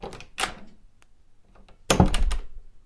Wooden door open-close
Opening and closing a wooden door.
close, door, open, wooden